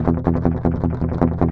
cln muted E guitar
Clean unprocessed recording of muted strumming on power chord E. On a les paul set to bridge pickup in drop D tuneing.
Recorded with Edirol DA2496 with Hi-z input.